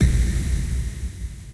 LOW BIT BOOM
Low Bit Crushed impact Boom
Crushed, Low, kickdrum, Bit, impact, Boom